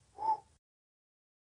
lanzar palo por los aires
aire, lanzar, palo